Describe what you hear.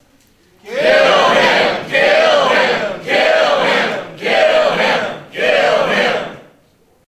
Crowd Chant Kill Him
Recorded with Sony HXR-MC50U Camcorder with an audience of about 40.
chant, chanting, crowd, him, kill